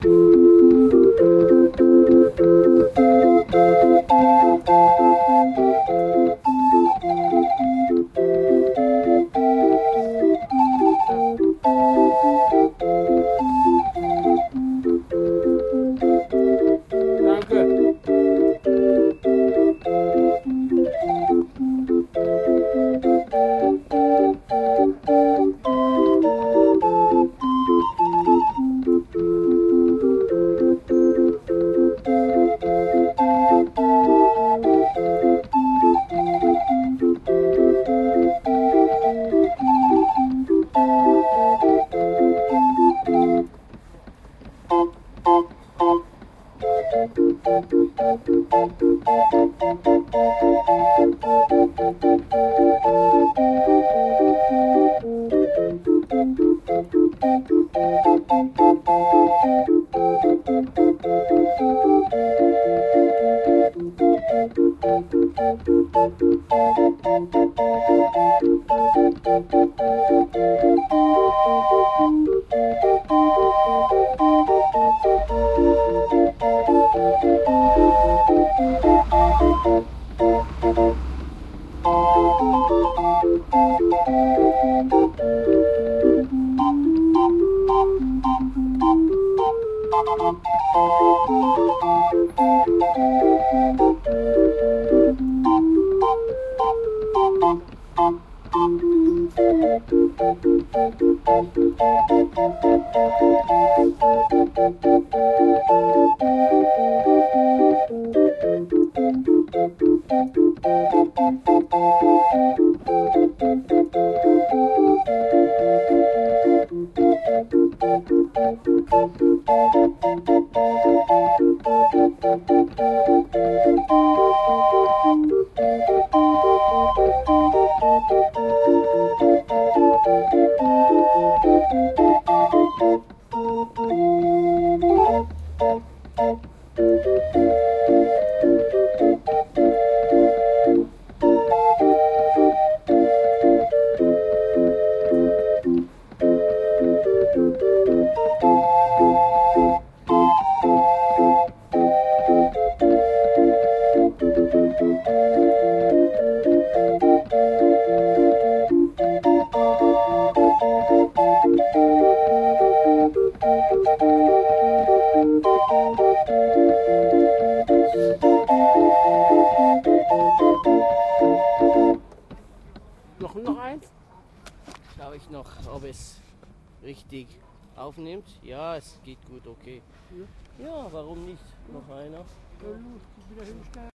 old organ grinder recorded on a Wednesday morning near Nollendorfplatz in Berlin Sept. 2008.
berlin, field, german, grinder, music, old, organ, recording